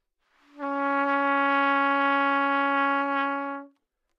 Trumpet - Csharp4
Part of the Good-sounds dataset of monophonic instrumental sounds.
instrument::trumpet
note::Csharp
octave::4
midi note::49
good-sounds-id::2856